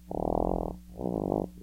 phone back texture contact 2
Contact mic edge rubbed against ribbed rubber back texture of a Motorola Moto X.